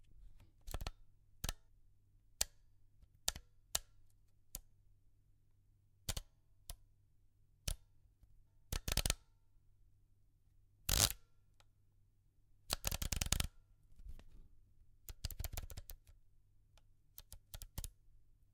Changing aperture, rotating ring on old 35mm camera (Konica).
Recorded with Rode NT1-A microphone on a Zoom H5 recorder.